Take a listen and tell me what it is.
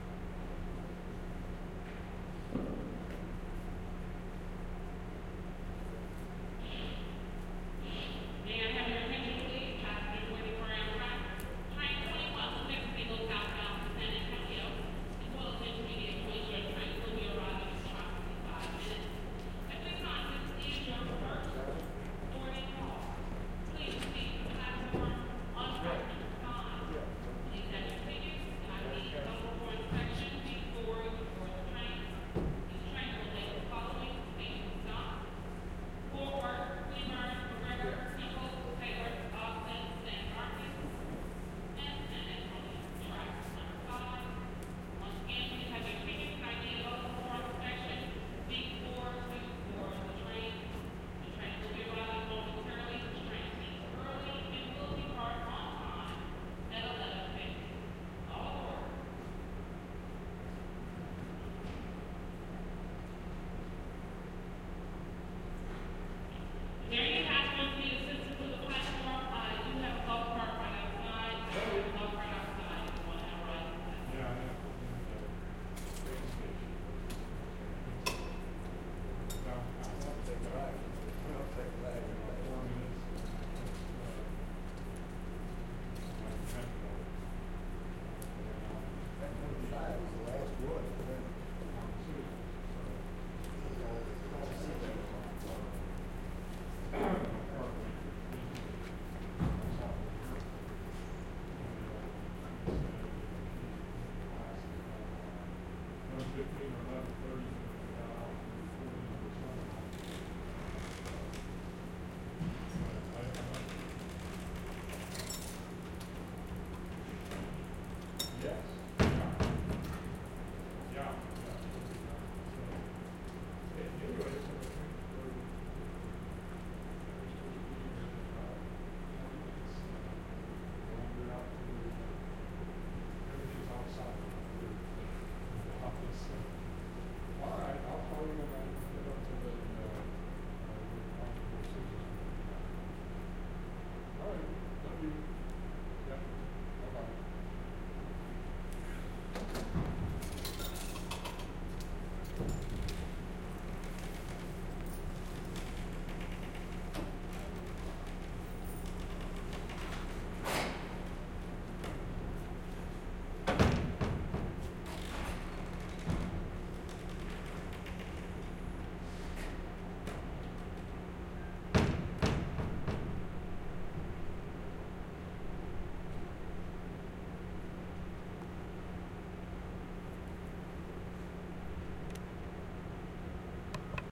Part of the Dallas/Toulon Soundscape Exchange Project
Date: 1-25-2011
Location: Dallas, Union Station, inside station
Temporal Density: 2
Polyphonic Density: 2
Busyness: 2
Chaos: 2
announcement, conversation, door-closing, train-station